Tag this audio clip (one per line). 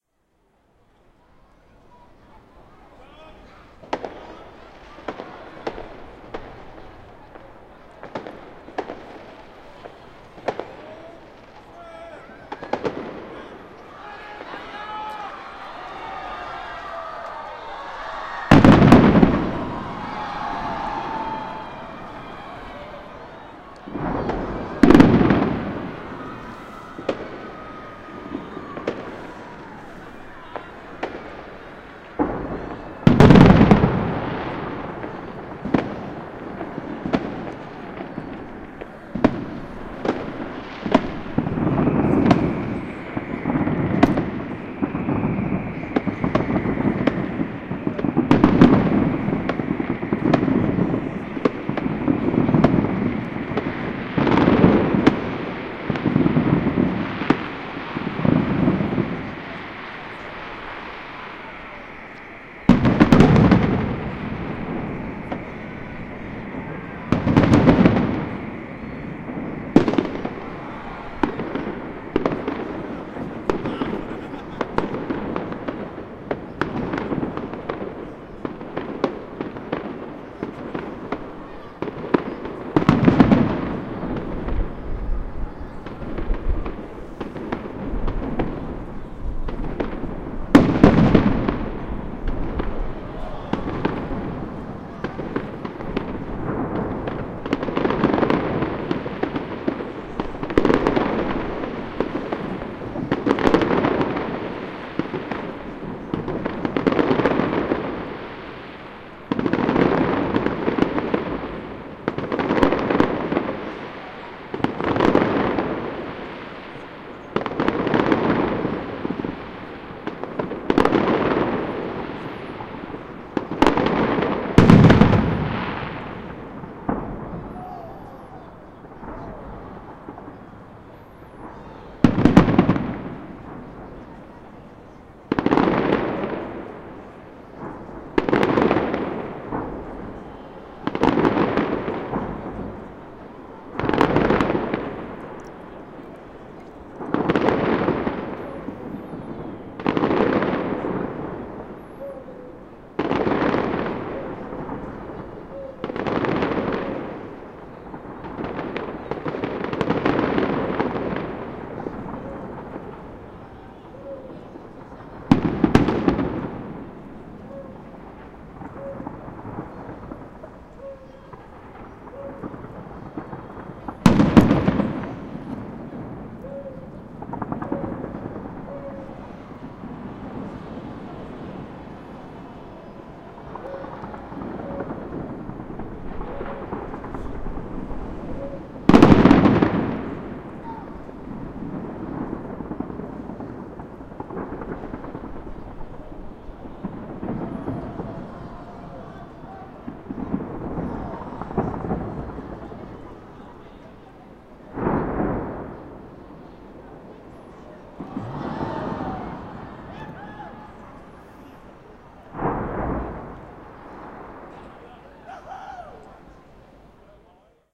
2009
boom
crowd
dog
field-recording
fireworks
helicopter
new-years
taipei-101
taiwan